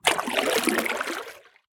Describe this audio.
Water Paddle med 010
Part of a collection of sounds of paddle strokes in the water, a series ranging from soft to heavy.
Recorded with a Zoom h4 in Okanagan, BC.
boat, field-recording, lake, paddle, river, splash, water, zoomh4